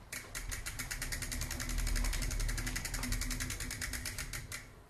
Simple bicycle gear sound